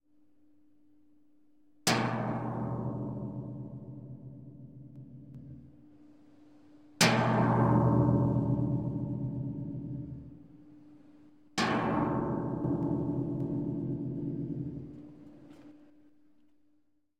Working on a large stainless steel tank